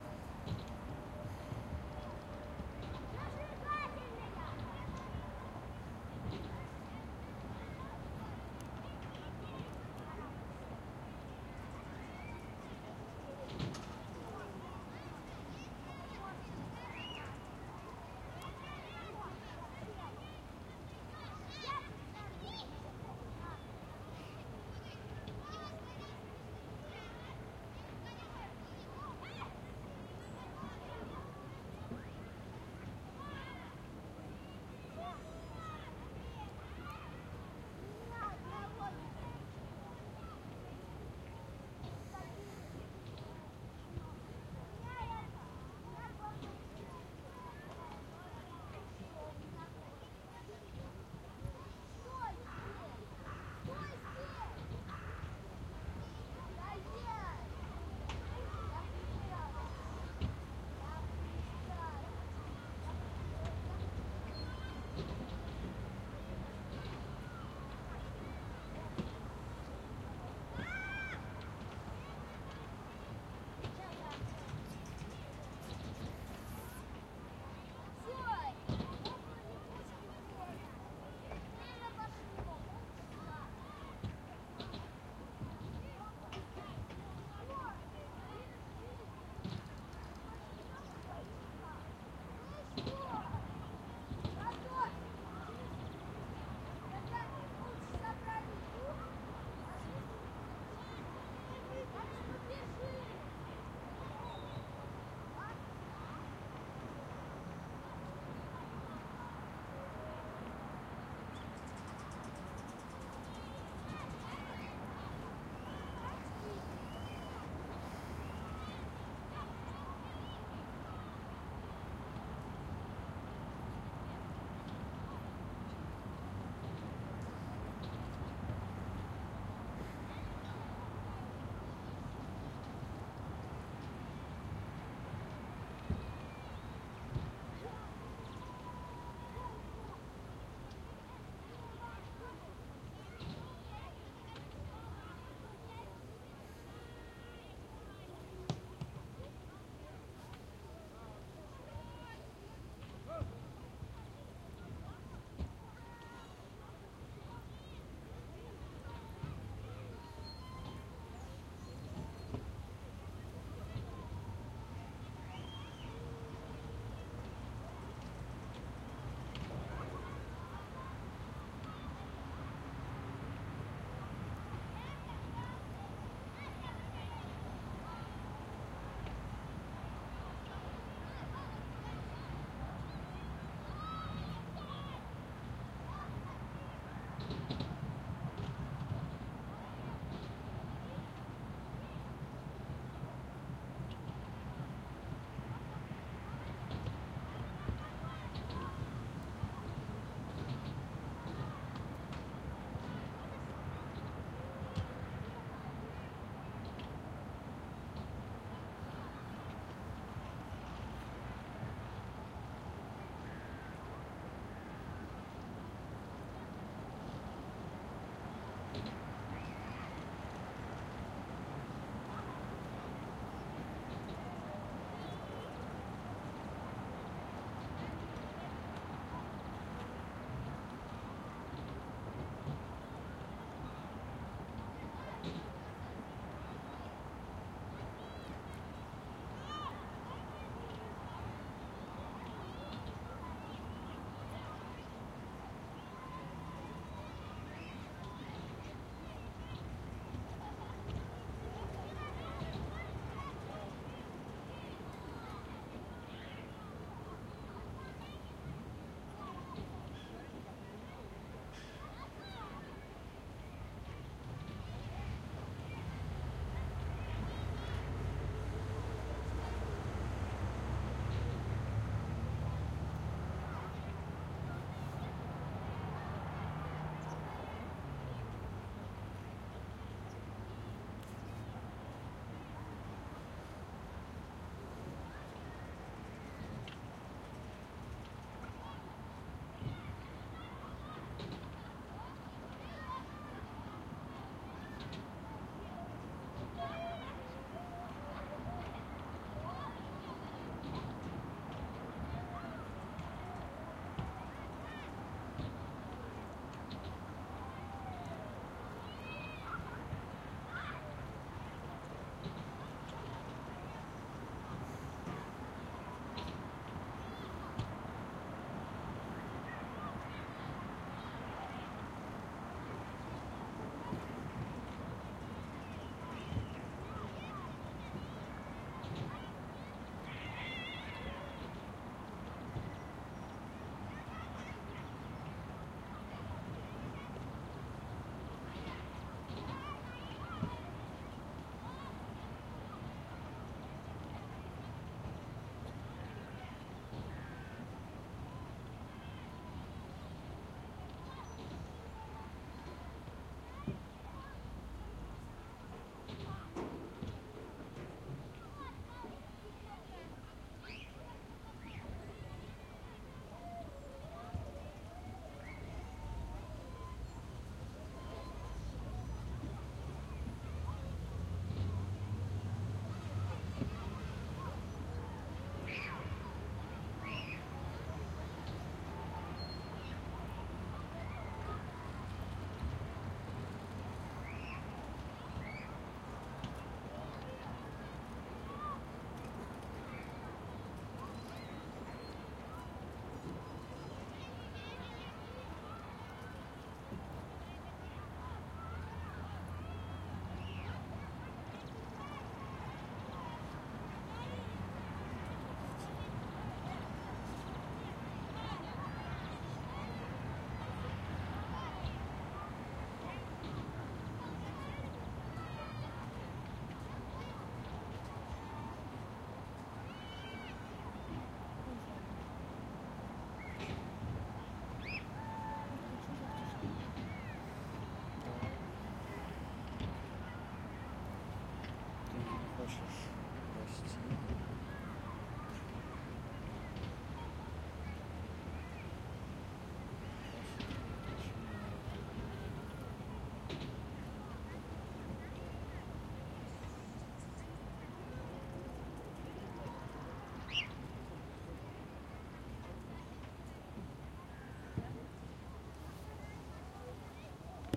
Park ambience in Moscow
Children's park in Moscow, distant traffic. May 2015.
ZOOM H2n
ambience, field-recording, kids, moscow, park